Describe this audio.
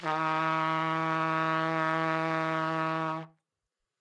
Part of the Good-sounds dataset of monophonic instrumental sounds.
sample, single-note, trumpet